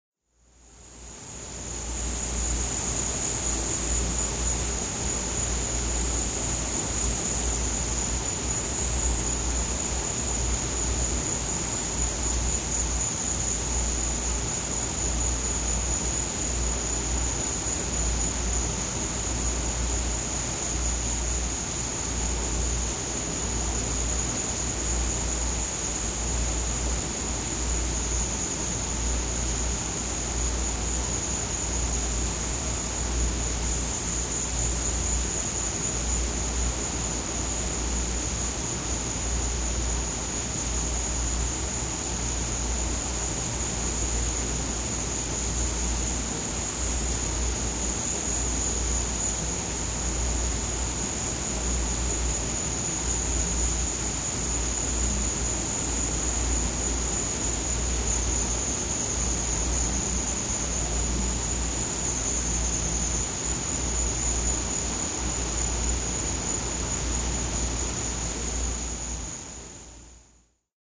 The sounds of crickets and the wind blowing through the jungle at night on Mexico's Yucatan Peninsula.Recorded on January 5, 2015 at 11:03 p.m. with a temperature of 75-degrees Fahrenheit.